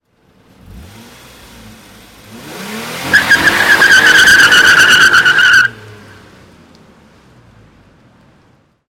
The sound of a car doing a short burnout. The car is a 3.0L V6 Nissan Maxima. Recorded with a Rode NTG2 into a Zoom H4.